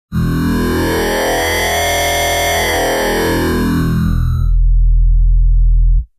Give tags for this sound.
Bass Growl Dubstep

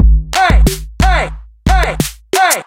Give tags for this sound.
beat loop drums vocals

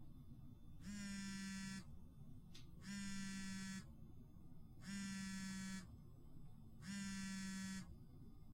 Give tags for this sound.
call cell-phone mobile phone ringing vibration

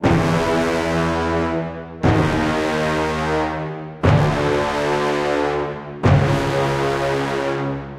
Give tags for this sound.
Brass Cinematic Film Loop Melodic Orchestra Trailer

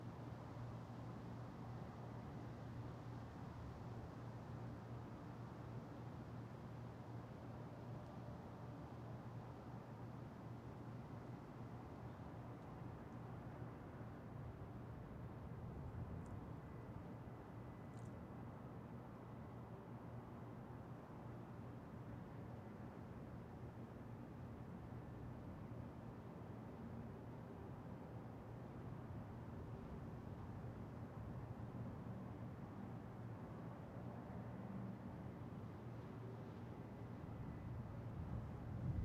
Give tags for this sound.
wind exterior tone suburban field-recording neighborhood outside ambience air ambiance

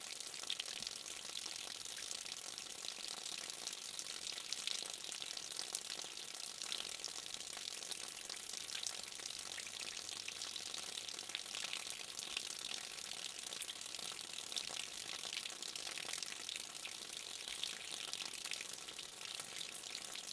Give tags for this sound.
ambiance ambience ambient atmosphere field-recording fountain nature stream water

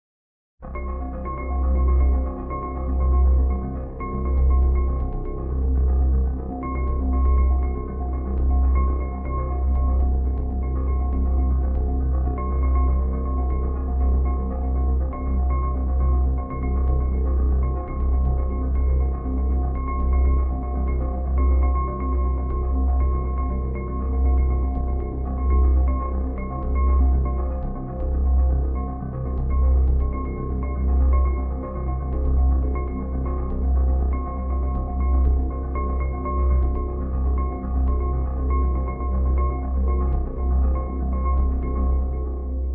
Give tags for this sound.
action chase crime-drama

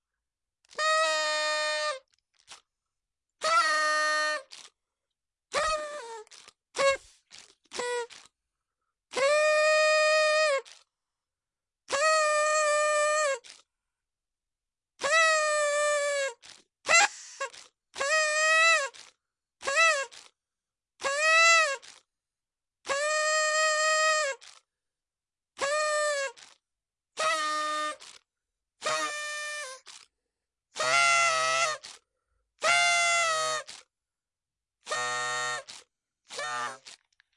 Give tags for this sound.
horn festival party

